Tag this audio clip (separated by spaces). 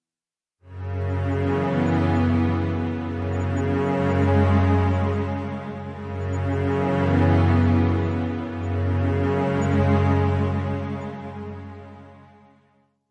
ambience ambient atmosphere background background-sound cinematic dark deep drama dramatic drone film hollywood horror mood movie music pad scary soundscape spooky story strings suspense thrill thriller trailer